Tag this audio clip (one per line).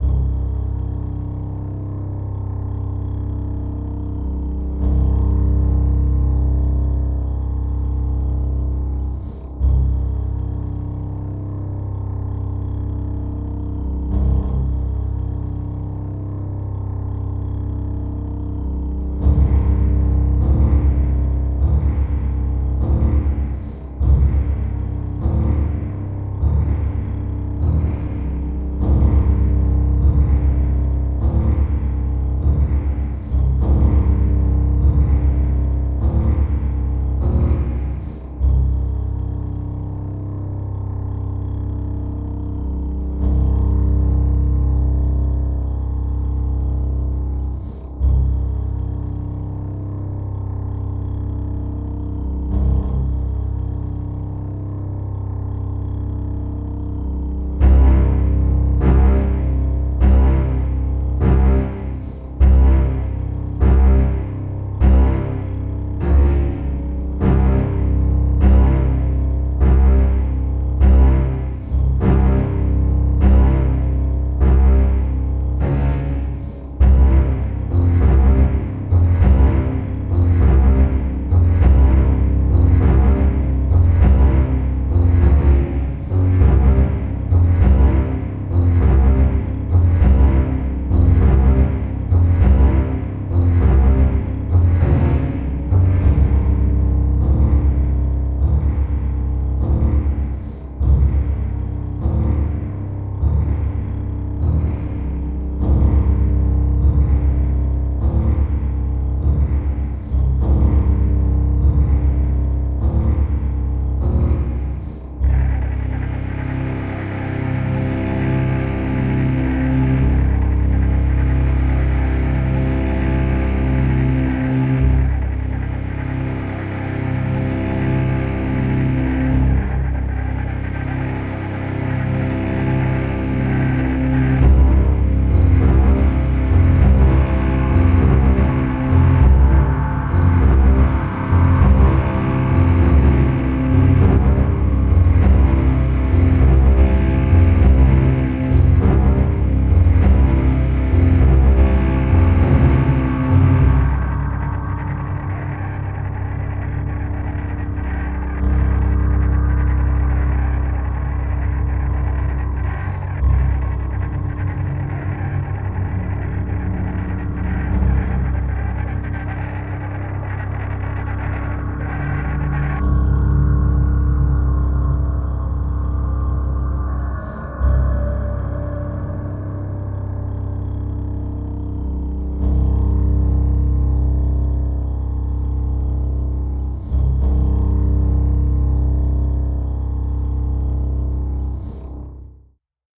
Amb
ambiance
ambience
Ambient
Atmosphere
Atmospheric
cello
Cinematic
creepy
Dark
Eerie
Film
Game
Horror
Intense
Movie
Mysterious
Scary
Sound-Design
Soundtrack
Spooky
Strange
string
Suspenseful
Video-Game
violin